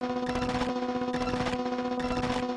short machine rhythm
Casio CA110 circuit bent and fed into mic input on Mac. Trimmed with Audacity. No effects.
Bent, Casio, Circuit, Hooter, Table